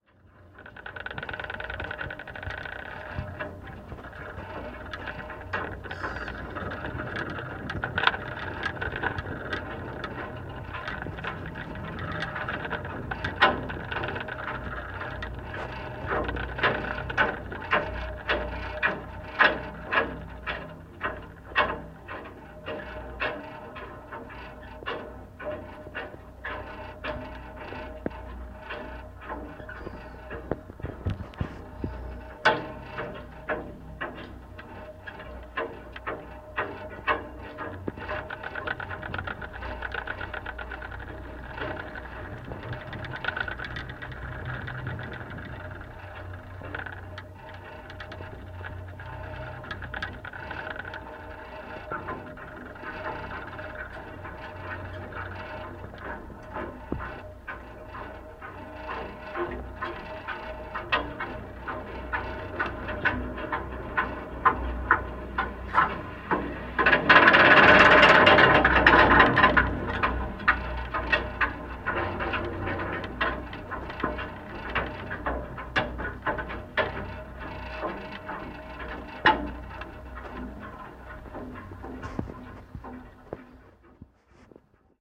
Contact Mic Newport Footbridge Floor 03
contact, contactmic, eerie, footbridge, newport, rumble, vibration